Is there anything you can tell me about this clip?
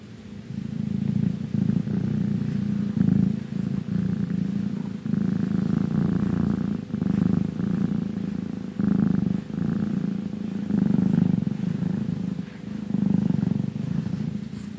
Cat purring.
How it was created: I caressed my cat and I recorded it with my Samsung J5.
Software used: Audacity to cut it
Animal, Cat, Purring